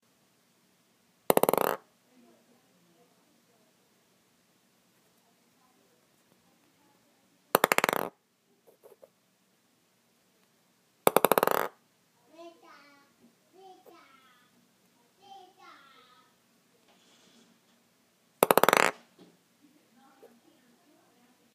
Ping Pong Ball Drop
Plastic ping-pong ball being dropped approx. one inch onto wooden table, recorded with internal mic of iPhone 4S (sorry to all audio pros 😢). Poor man's imitation of unknown hammer sound in grandfather clock chiming mechanism.
ball, bounce, drop, patter, ping-pong, ping-pong-ball, plastic